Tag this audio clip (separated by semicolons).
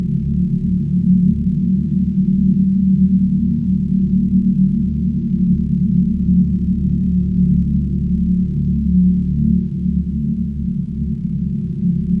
dark; sphere; dark-atmosphere; loop; horror; creepy